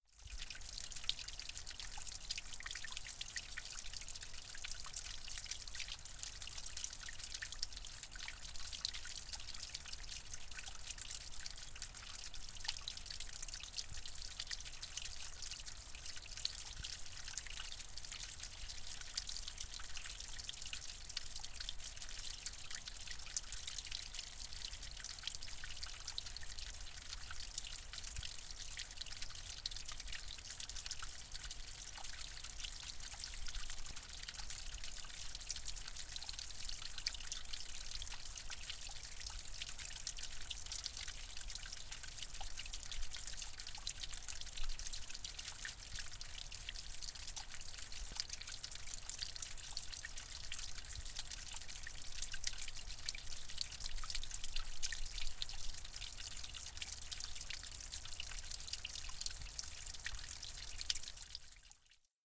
bm drip
Small stream splashing on to rocks.